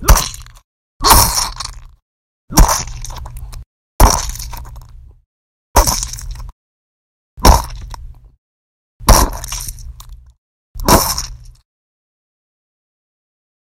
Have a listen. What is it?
Hit sound
gore, hit, hurt, meat